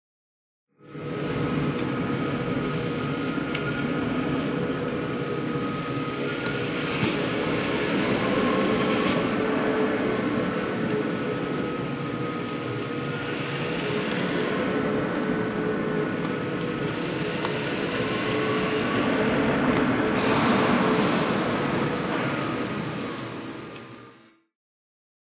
MITSUBISHI IMIEV electric car DRIVING door ajar
electric car DRIVING door ajar
ajar, car, door, DRIVING, electric